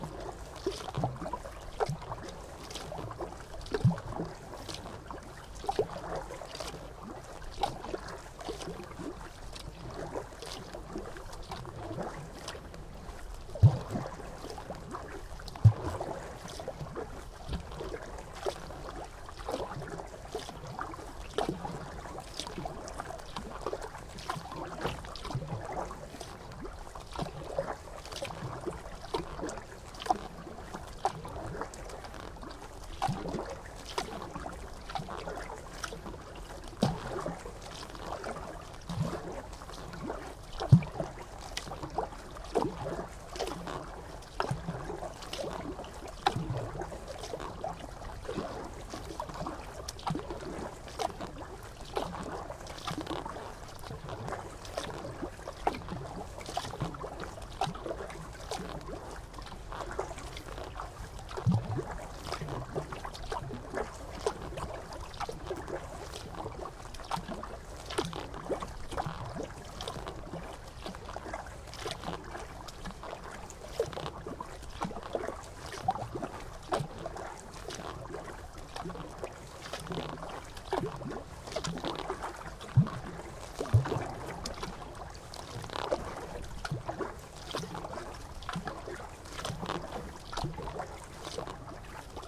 Kayaking in calm weather
Paddling on the bay on a very still, quiet day.
Always fun to hear where my recordings end up :)